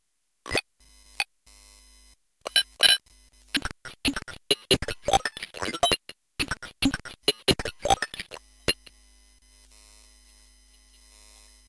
Circuit bent (dyslexic friendly) speak and spell toy transferred to computer via handy headphone output